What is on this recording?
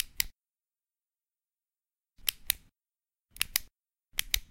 A basic flashlight sound effect.
button, flash, lightswitch, switch, torch